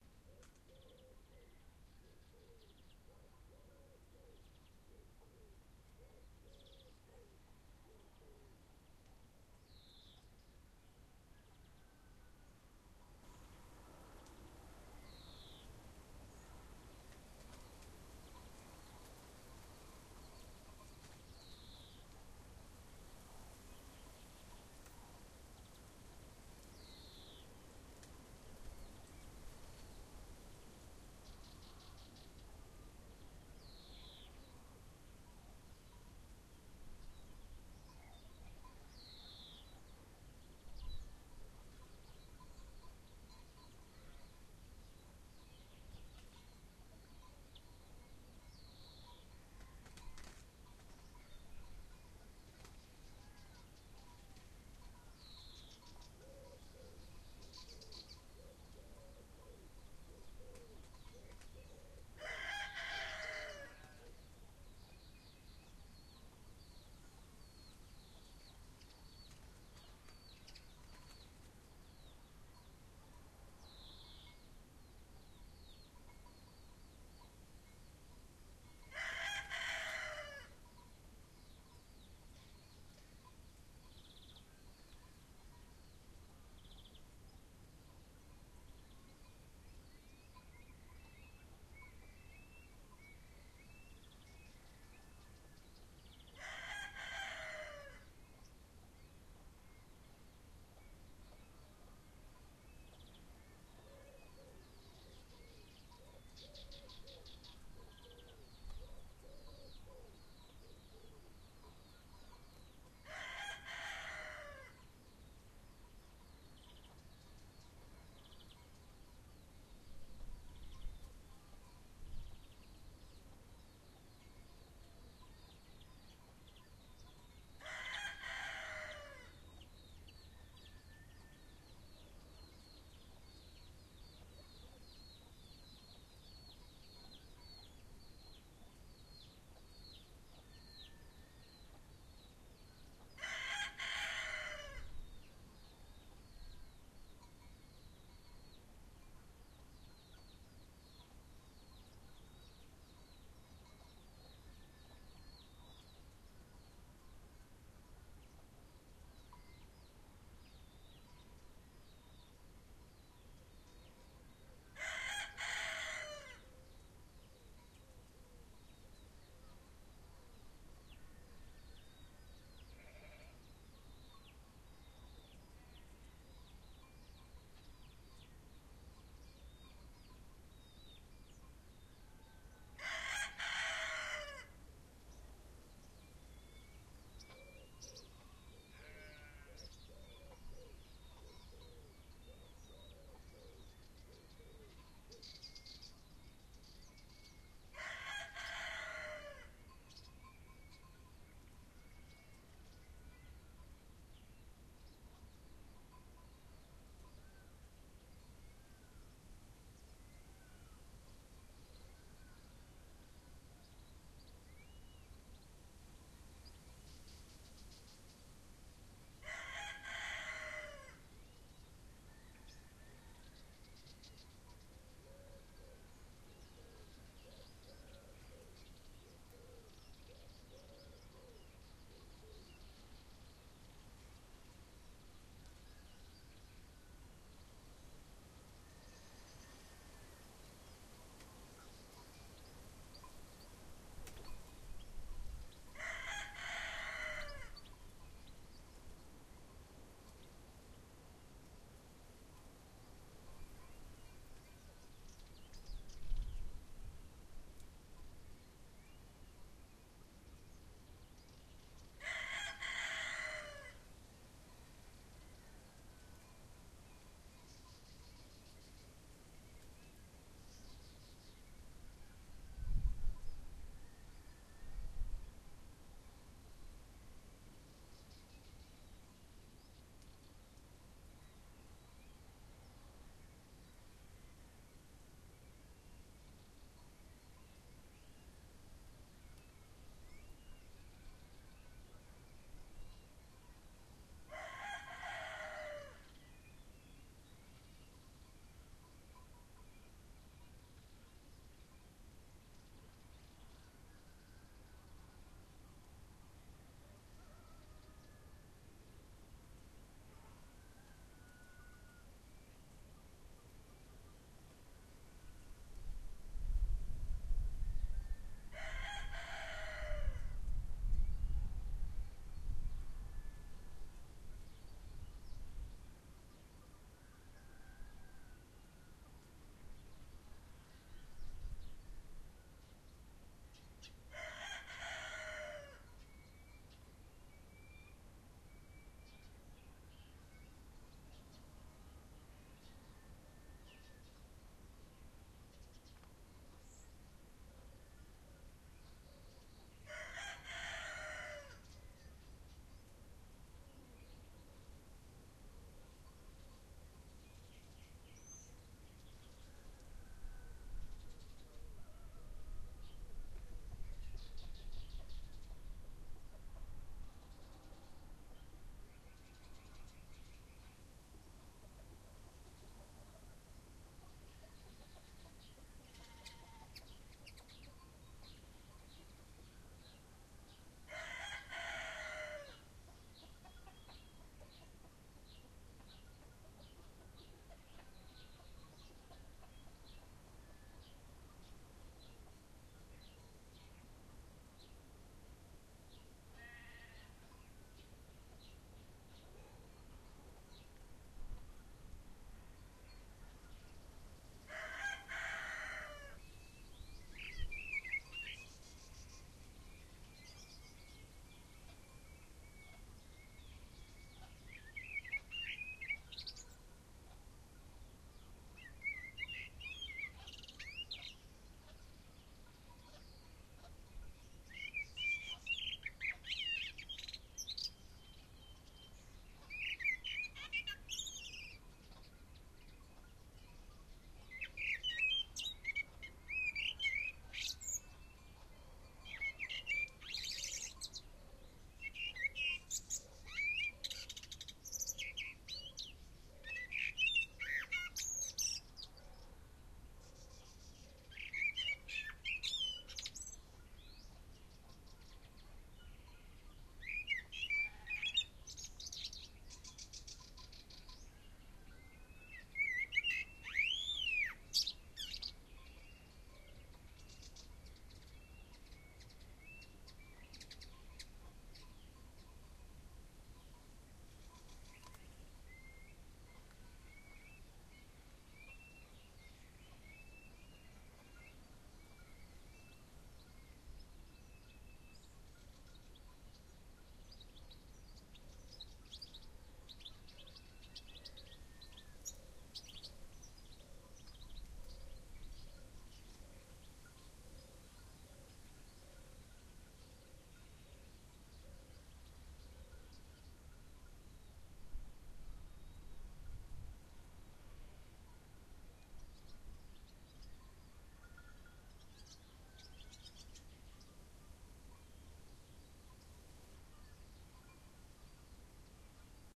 Morgen Hahn Schafe Amsel
Morning in the countryside, birds singing, rooster´s concert, bells of sheep soften jingling, from time to time sheep mowing, also young sheep, at the end a Blackbird sings a beautiful song.
Recorded with ZoomH2N in Mallorca.